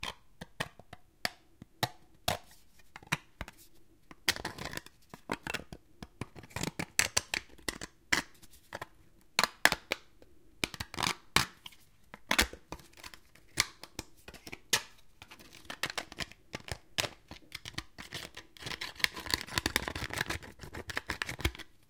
Plastic Scratching Plastic
Pieces of plastic disks scratching each other.
Recorded with a Zoom H2. Edited with Audacity.
Plaintext:
HTML: